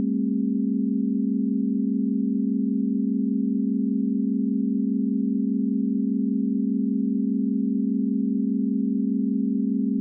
base+0o--4-chord--09--CDFC--100-100-100-20
test signal chord pythagorean ratio
pythagorean, chord, test, signal, ratio